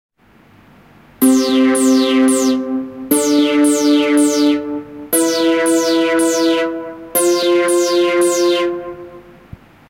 Preset do Volca Keys 3
Preset do Volca Keys. Gravado com app audio recorder para smartphone Android.
analog, preset, synthesizer, volca